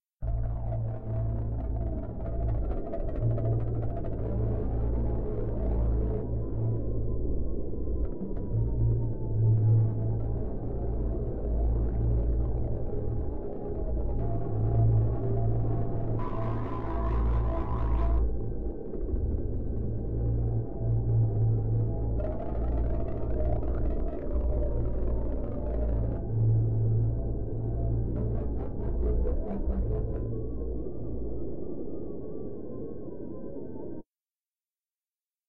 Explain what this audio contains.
Emergency landing on an alien planet. It's a stormy ice planet.
2 Synthesizers used:
V-Station, Firebird (a good freeware synth)